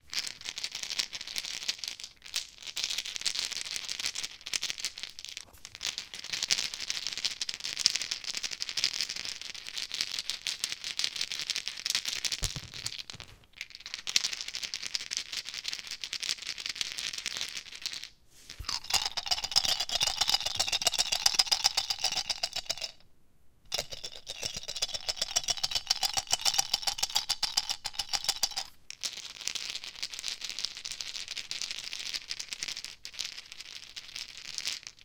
Shaking Dice
Shaking a trio of dice.
Recorded with Zoom H2. Edited with Audacity.